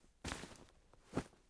A short clip of a bag rustling. Meant to mimic the sound of C4 being placed.
bag, rucksack, rustle